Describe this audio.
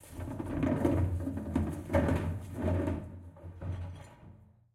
Wheelie Bin - taking out the trash
Taking out the wheelie bin. Recorded with Zoom H4N.
trash, garbage